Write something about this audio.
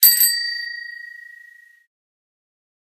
bicycle-bell 08
Just a sample pack of 3-4 different high-pitch bicycle bells being rung.
bell, bells, bicycle, bike, bright, chime, chimes, clang, contact, ding, glock, glockenspiel, high-pitched, hit, metal, metallic, percussion, ping, ring, ringing, strike, ting